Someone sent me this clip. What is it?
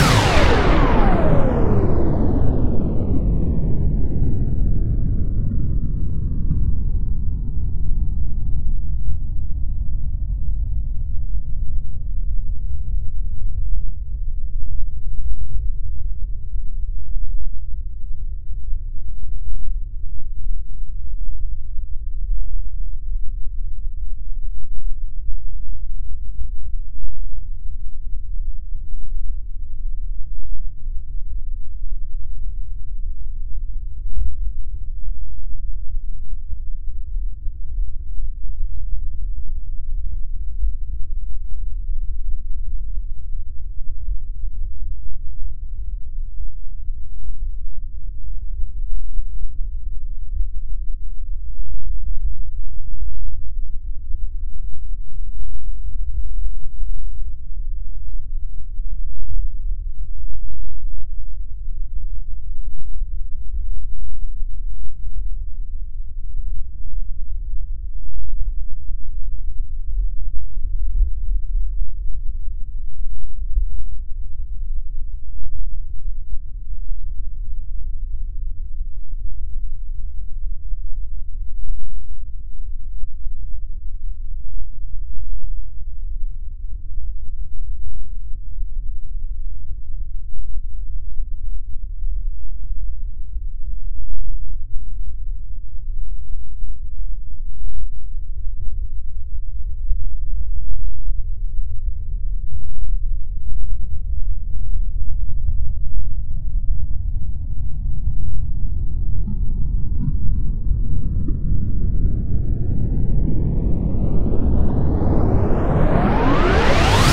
the laser/machine break/destroy/power off/power down/shut down effect